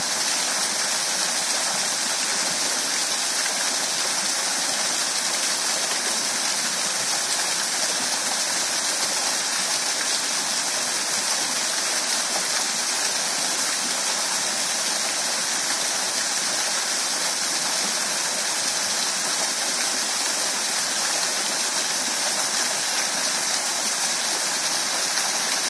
Strickland falls rivulet
Recording of the Hobart rivulet below Strickland Falls, South Hobart, Tasmania, Australia
field-recording, water